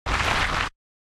feet on gravel